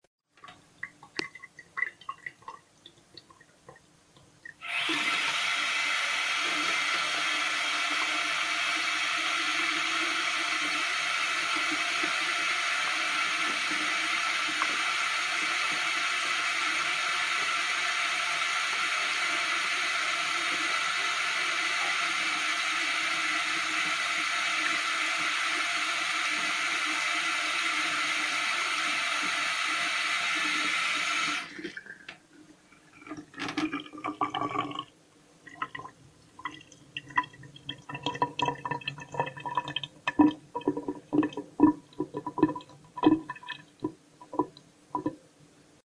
Sink sound record20151219011754
sounds of metal sink. Recorded with Jiayu G4 for my film school projects. Location - Russia.
sink, drain, water